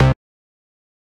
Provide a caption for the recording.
Synth Bass 003
A collection of Samples, sampled from the Nord Lead.
bass, lead